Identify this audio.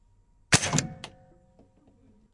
X-Shot Chaos Meteor Ball Shot
Shooting the X-Shot Chaos Meteor. This gun is loud and fires balls similar to the Nerf Rival Series.
Ball, Blaster, Chaos, Dart, Fire, Foam, Gun, Nerf, Nerfgun, Nerf-Gun, Pistol, Plastic, Reload, Rifle, Rival, Shoot, Shot, Toy, X-Shot, XShot